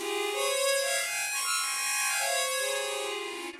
Chromatic Harmonica 11
A chromatic harmonica recorded in mono with my AKG C214 on my stairs.
chromatic, harmonica